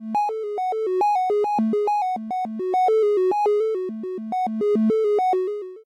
Retro Melodic Tune 18 Sound
8bit, computer, cool, effect, game, melodic, melody, old, original, retro, sample, school, sound, tune